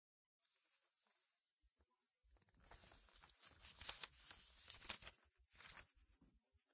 Turning pages of paper packet on school desk – no reverb